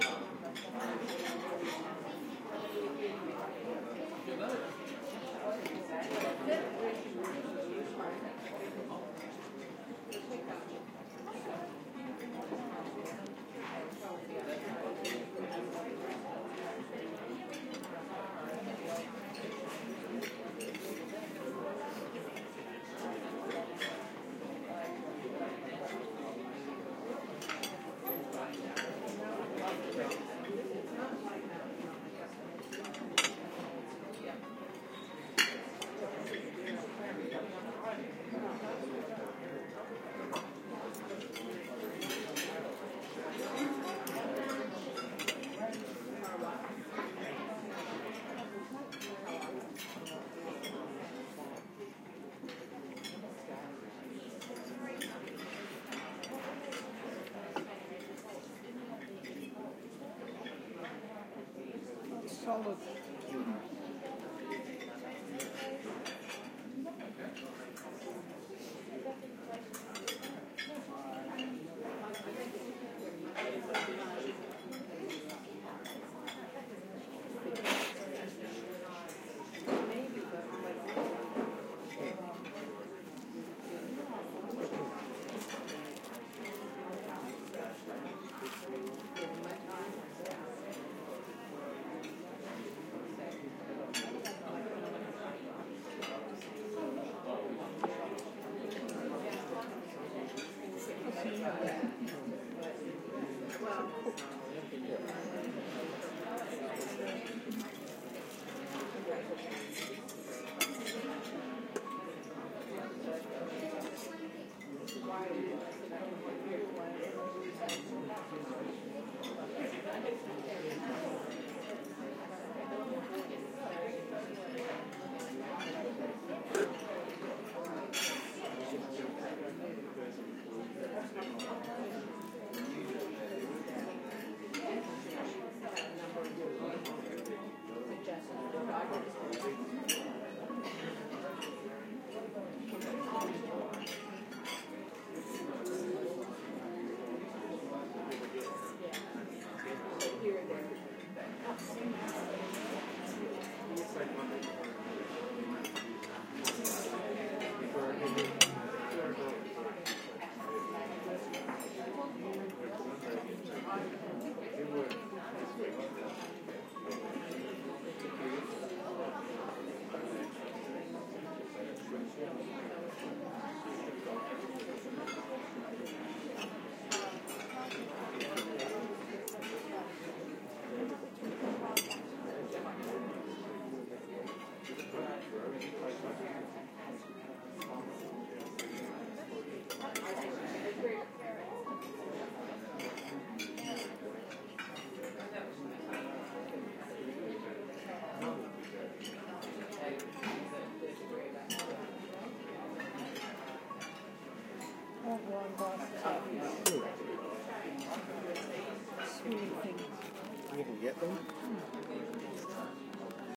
While sitting in an (up-market) Indian restaurant I couldn't help but take out my field-recorder and putting it on the table while eating. I placed the binaural mics about 15 cm apart, face up on the table.